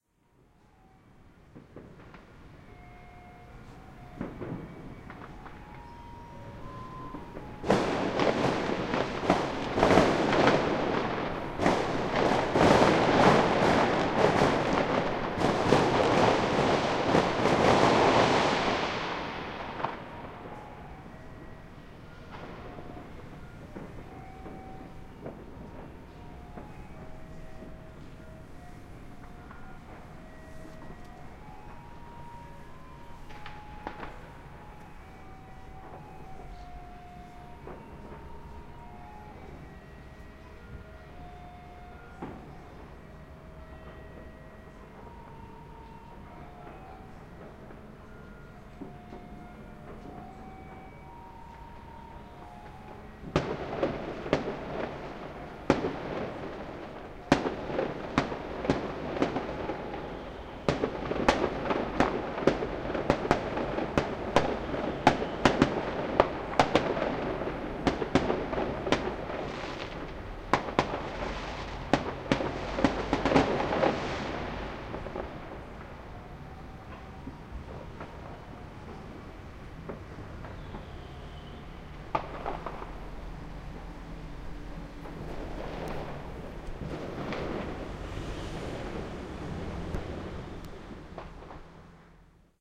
January 25, 2009
Chinese Lunar New Year's Eve, Dali Street, Wanhua District, Taipei.
Garbage truck playing Beethoven's "Für Elise" summons neighbors for the last trash pick up before the holiday. Folks in a nearby park set off impressive explosions. Raw.